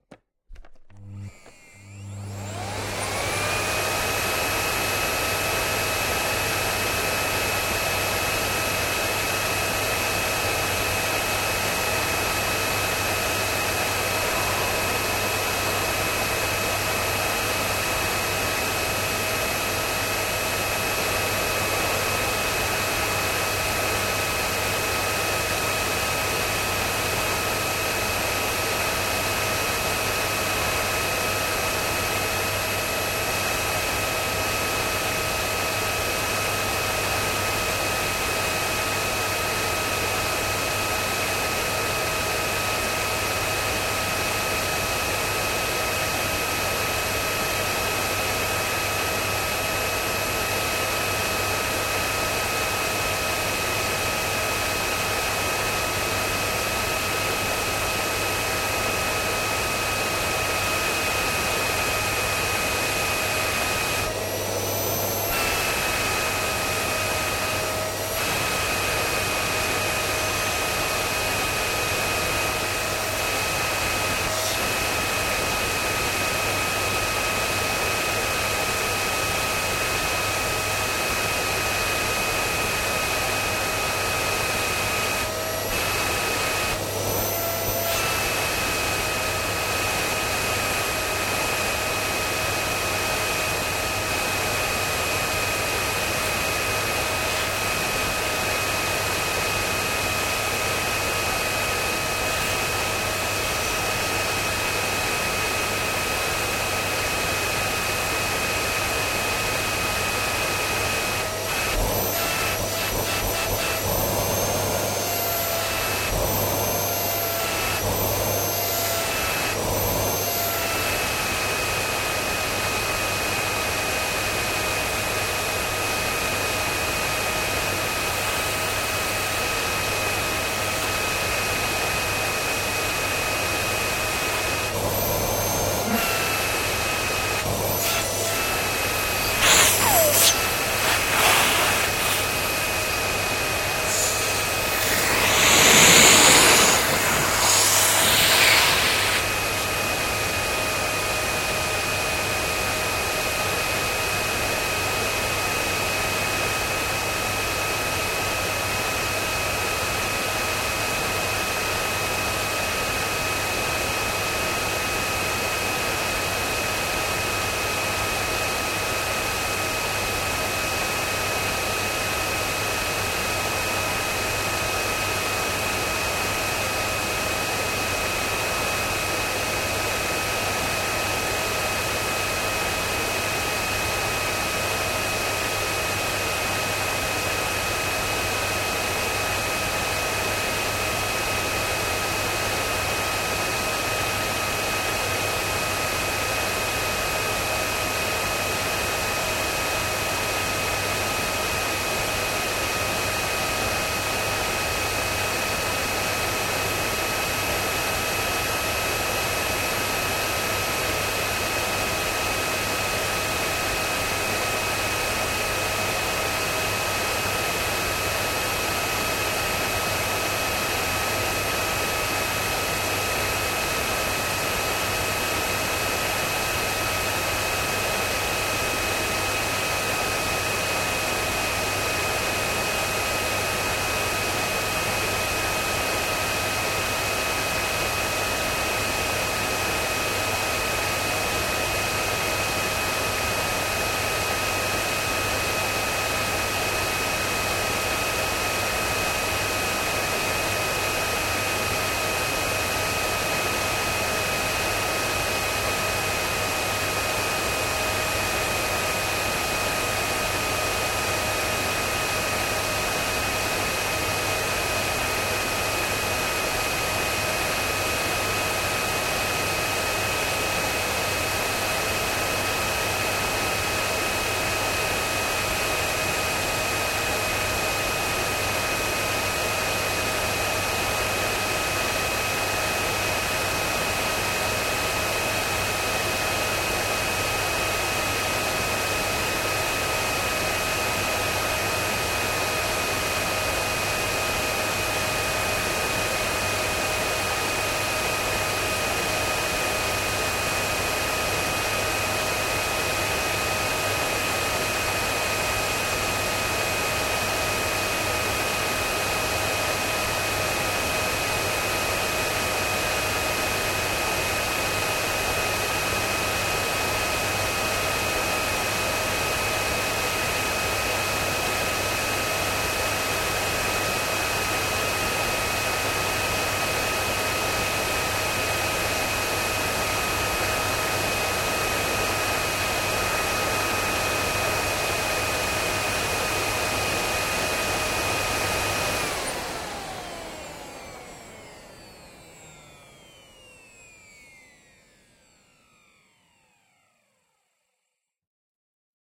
20180123 Vacuum cleaner
appliance, cleaner, drone, home, hoover, onesoundperday2018, Staubsauger, vacuum